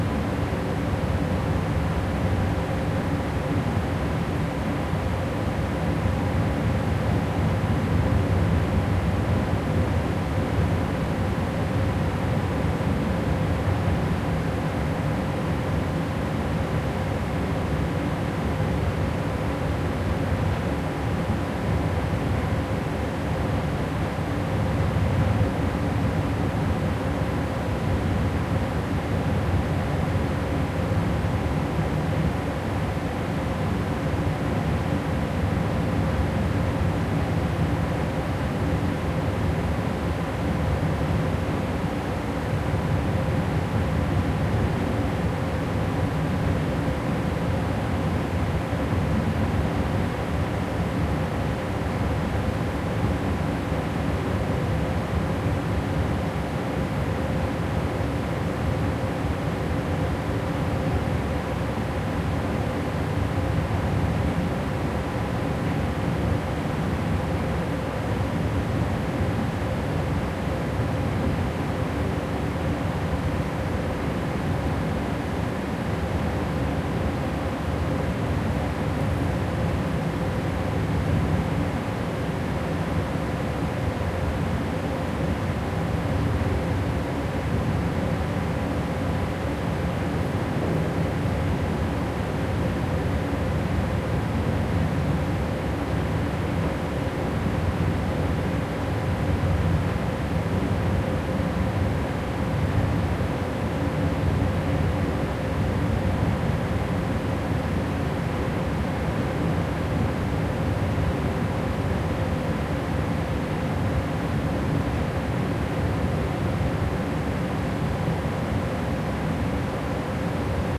170722 MediumFerry CarDeck R
Inside the car deck of a medium sized passenger ferry underway to the Island of Öland in Sweden. The deck is open to the sea on the fore and aft sections of the ship, so some sea chop can be heard in the rear. Drone of the diesel motors with a full frequency range dominates.
Recorded with a Zoom H2N. These are the REAR channels of a 4ch surround recording. Mics set to 120° dispersion.
engine, interior, ferry, field-recording